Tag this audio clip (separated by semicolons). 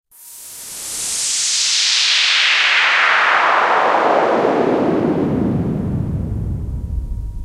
atmosphere; techno